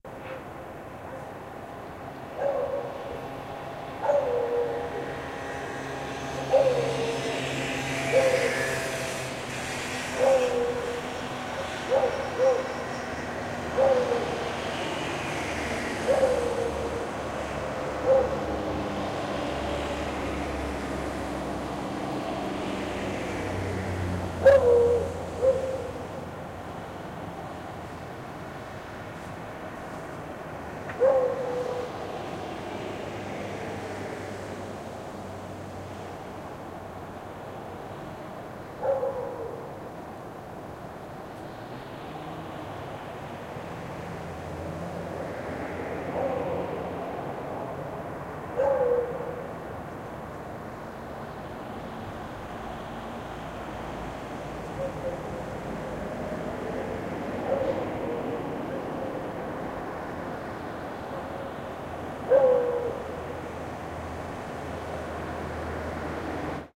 streetlife beagle 1
Beagle barking in a balcony (Barcelona). Recorded with MD MZ-R30 & ECM-929LT microphone.
barking, dog, street